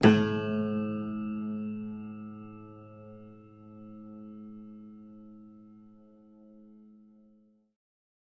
A cool piano sound I made messing with an out-of-tune upright. The tuning is approximately "A."